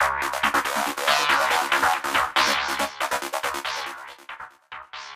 TR LOOP - 0509
goa goa-trance goatrance loop psy psy-trance psytrance trance
psytrance, goa, loop, psy-trance, psy, trance